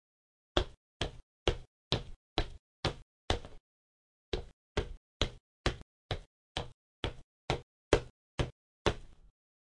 Footstep Tile
Footsteps recorded in a school studio for a class project.
feet, foot, footstep, footsteps, step, steps, walk, walking